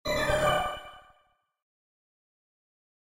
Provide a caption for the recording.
I used FL Studio 11 to create this effect, I filter the sound with Gross Beat plugins.
computer, digital, fx, sound-design, robotic, sound-effect, lo-fi, game, electric